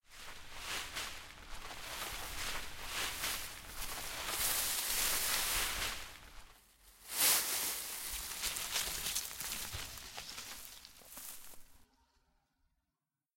Someone climbing out of tree.
AudioDramaHub, leaf